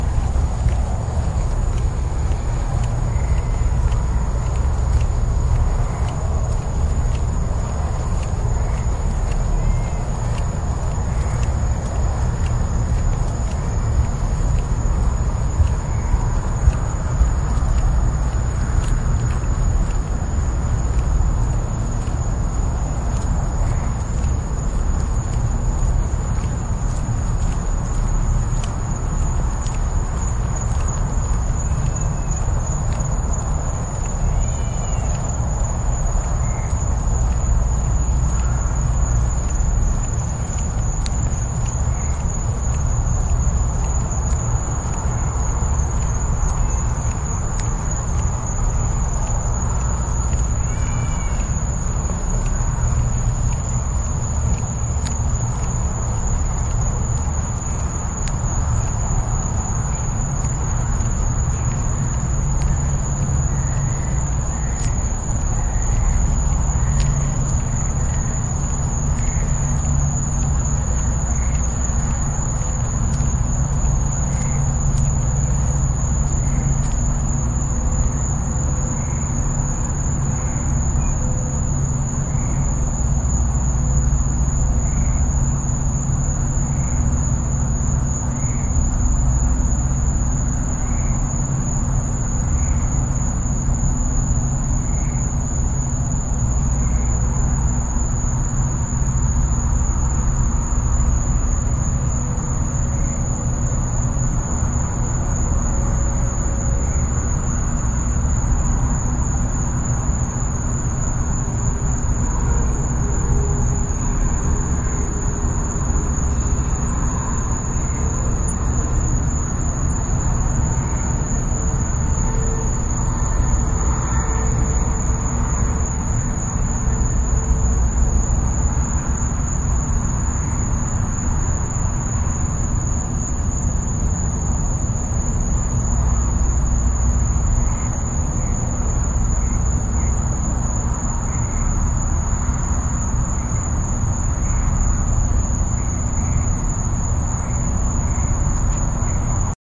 What seams like silence to my brain after the noises are filtered out is actually pretty noisy. This is outside at night in the summer recorded with Olympus DS-40 with Sony ECMDS70P.